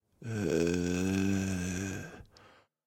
SZ Zombies 04
A real zombie moan. Recorded from a live zombie.
groan, moan, throat, vocal, voice, zombie